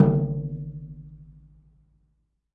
Metal container hit not too loud
Firmly hitting metal container